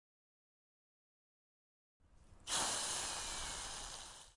The sound of hissing hot stone in the water.